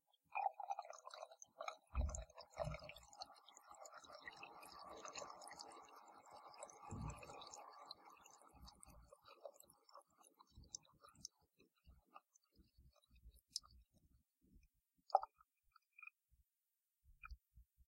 Robinet qui coule Laura BEGUET M1 Scénar
This sound is the noise of a tap water recorded in the university's bathroom; Thank to Audacity it has been reduced to 48dB to make it more clear.
C'est un son continu complexe.
1)Masse:
Son seul complexe.
2)Timbre harmonique:
Son léger, brillant et pétillant.
3)Grain rugueux.
4)Pas de vibrato.
5) Dynamique douce et graduelle.
6)Profil mélodique:
Variation serpentine.